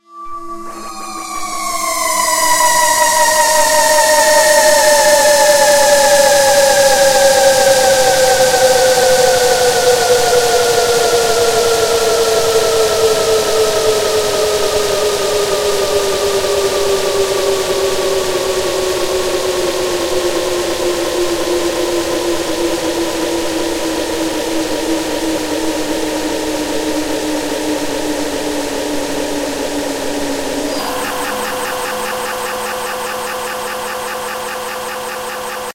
A very long, hoover-like sustained pad. Made these FX with a custom effect synthesizer made with synthedit.